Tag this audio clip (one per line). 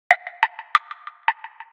electronic
loop
slap
delay